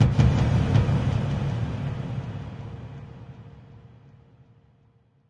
A huge, atmospheric tom-tom effect which might be useful for a horror movie or similar.